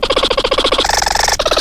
I used a cheap headset microphone and created the raw sound by pullin a hair comp across a paper tube. Then followed DSP i NERO Wave pad, mainly manipulating by means of amplification, speed, pitch, filter and bandpass.
I have thus created the sound of upset suricats.
animals, faked, field-recording, nature